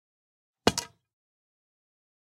ARROW TARGET IMPACT SINGLE ARCHERY 08wav
Direct exterior mic recording of an arrow being setup and placed then fired from 40lbs English Longbow into fabric target.
Recorded on rode shotgun mic into Zoom H4N.
De noised/de bird atmos in RX6 then logic processing.
archer, archery, army, arrow, bow, bow-and-arrow, bullseye, close, closeup, fire, firing, flight, foley, impact, longbow, military, practice, projectile, shoot, shooting, shot, string, target, war, warbow, warfare, weapon, weapons